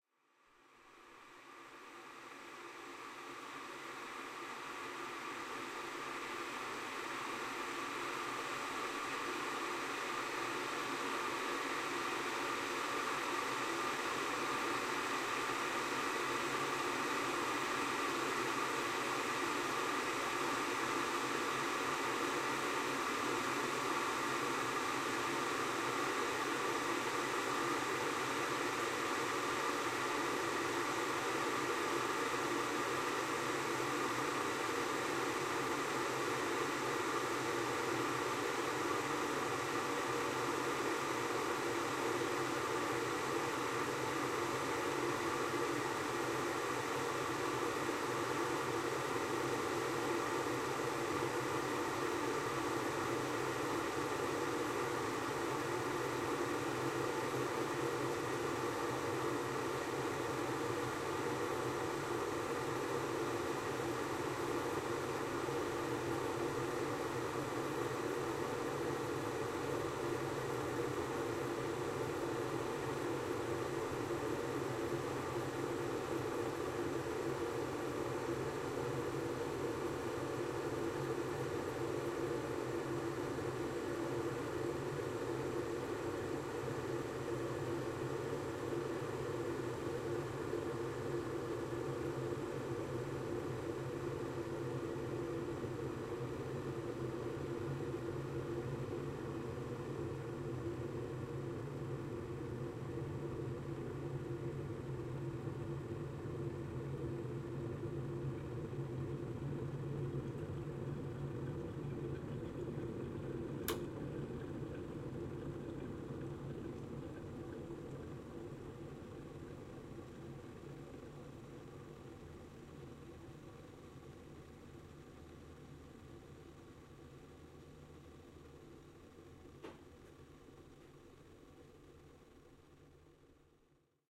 WATRTurb boiling a kettle TAS H6
kitchen, water, kettle, owi, boiling